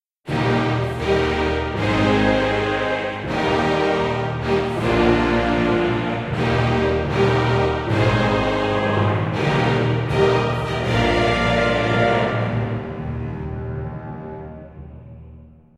Orchestral Royal Theme Fanfare
Royal orchestral fanfare music for scenes where the king arrives or someone enters the royal palace or a majestic city
music made with my sample libraries in StudioOne, with orchestral tools and oceania choirs libraries, and reverb added with Valhalla Room Vst
fanfare, victory, royal, music, choirs, inspirational, entrance, epic, film, medieval, trumpet, horn, announcement, trombone, king